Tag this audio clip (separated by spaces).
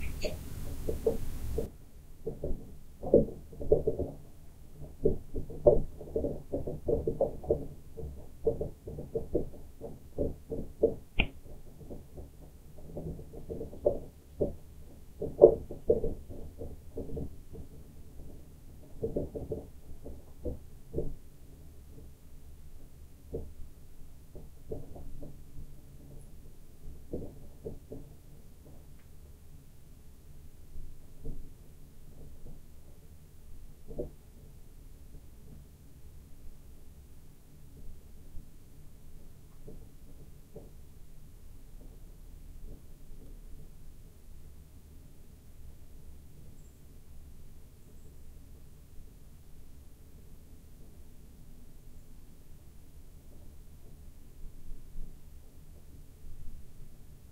strange attic vent odd field-recording noise weird house bathroom rattling ceiling fan